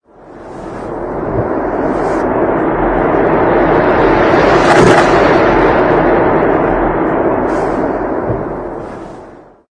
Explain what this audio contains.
DUMP SOUND REVERSED(FS)
Recorded at the local indoor dump with my Yamaha Pocketrak. The sound is reversed immediately followed by the normal forward sound. Edited with Sony Vegas. Thanks. :^)